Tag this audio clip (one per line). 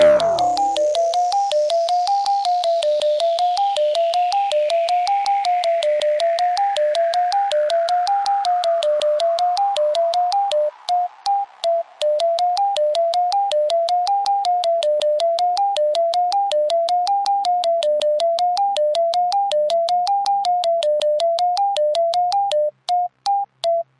fx-melody
melody
scale